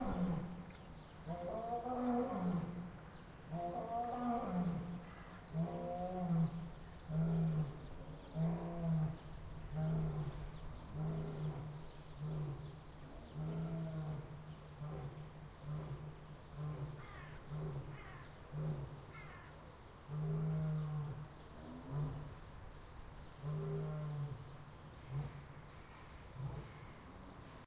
You hear a lion and some birds.
africa, lion, cat, field-recording, birds